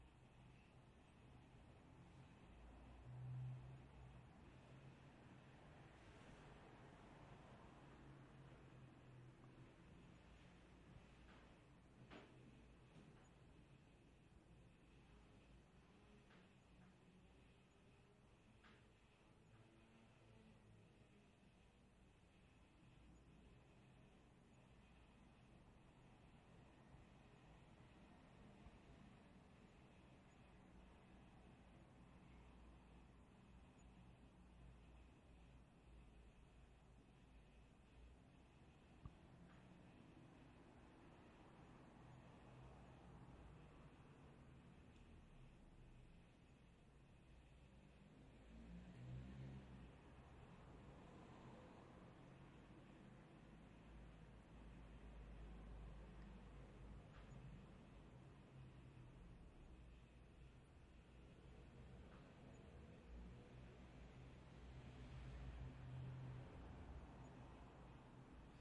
Silence - Parisian flat 1
Silence of a parisian flat near the street.
{"fr":"Silence - Appartement parisien 2","desc":"Silence d'un appartement parisien en bord de rue.","tags":"silence parisien appartement rue voiture"}
car, flat, near, parisian, silence, street